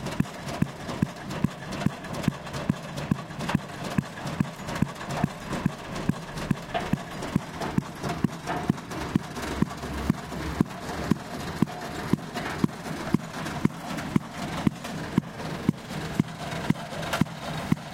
Piston Loop
Piston hammering metal foundation in the ground.
construction, copenhagen, denmark, pile, pile-driver, piston